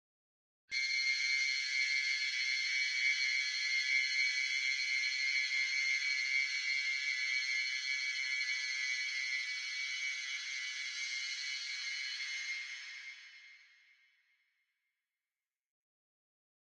Phaser, continuous fire
The sound of a classic "Star Trek" style phaser firing continuously for several seconds.
Created from an original sample of a screeching train.
space, firing, star, future, phaser, fire, laser, gun, star-trek, buzz, shoot, sci-fi, electronic, shooting, weapon, distortion